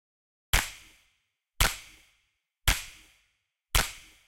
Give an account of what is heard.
Multi layered recording of clapping and clicking. with a touch of reverb on the clicks.